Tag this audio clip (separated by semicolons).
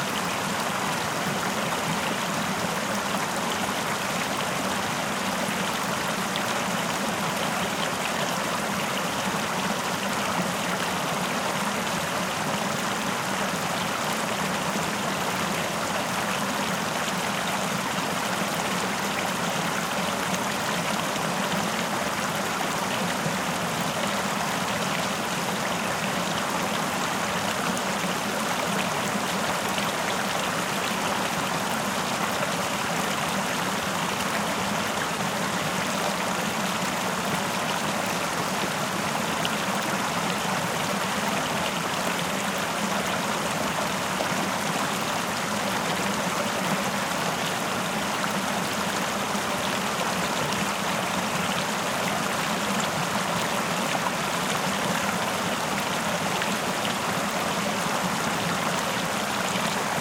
Ambience; Flow; Dam; Mortar; Creek; Nature; Water; Splash; River; Stream; Waterfall; Background